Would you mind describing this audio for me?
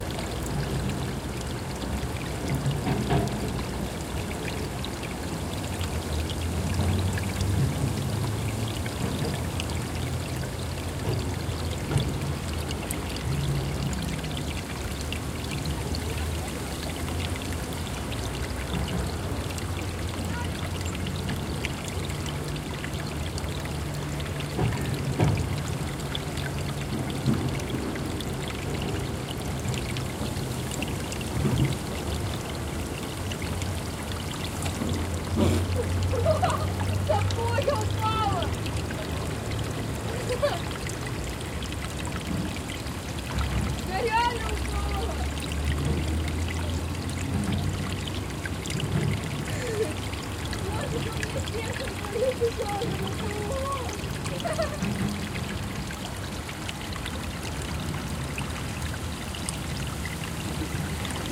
water flow roll girls1
Sound of water flow on roll. Voices of two girls on the background sound. This water flows from wastewater tube the riverside near Leningradsky bridge.
Recorded: 2012-10-13.